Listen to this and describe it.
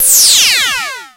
sfx-fuzz-sweep-4
Made with a KORG minilogue